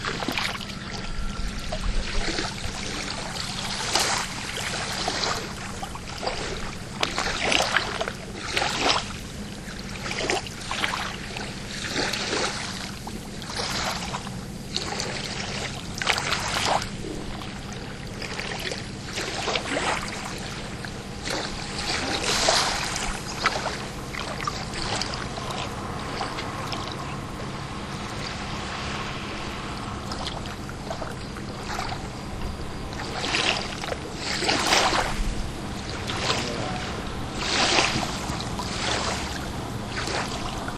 Seashore Atmos LW
Recording of lapping waves with distant boat approaching in the background.
lapping, boats, Seashore, distant, diesel, waves, Atmosphere